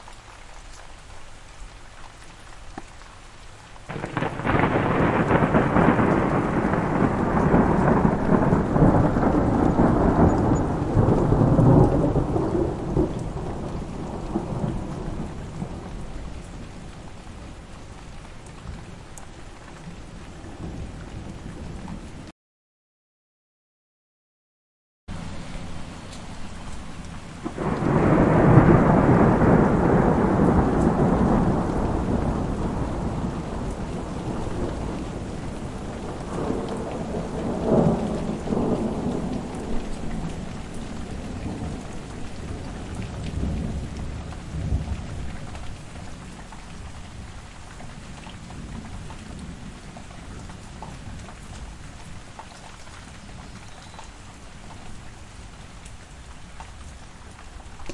Various Thunder Sounds